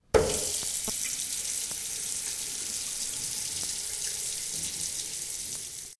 water tap
You can hear water dripping tap.
dripping, tap